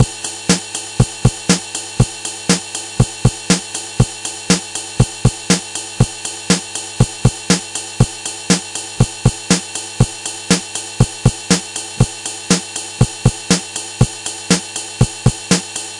pss-190 rocknroll beat through sh-101 filter
yamaha pss-190 drums through roland sh-101
sh-101, roland, pss-190, drums, through, yamaha